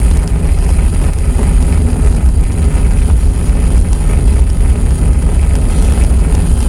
Flame Loop
The origin of this recording is a hob on the highest heat level recording slightly hot. Be very careful if you are doing this as fire is obviously detrimental to organic life and the microphone does not like to be on fire.
An "acidized" (marked with loop/cue flags) sample. I imagine a furnace combusting away. Rate-shifting this sample (pitch/speed) can give you variations in your DAW (digital audio workstation). This sample in particular feels appropriate for use as a background furnace sound or if sped up, a flamethrower.